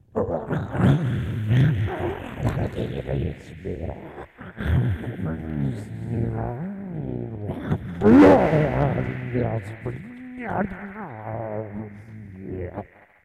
I decided to make monster-like noises into my cheap mic, and something odd came out. I will never be able to reproduce this sound vocally again.
growl, monster, lo-fi, echo